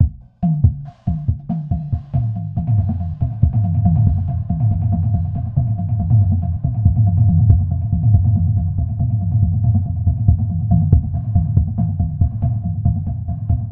Jazz Voktebof Dirty
these are some new drumloops i have to get rid of.